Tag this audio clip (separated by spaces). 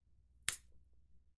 snapping gore break celery